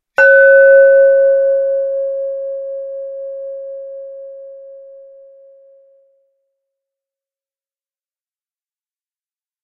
Glass Lid Revisited
lid, ring, gong, percussion, bell, glass, ding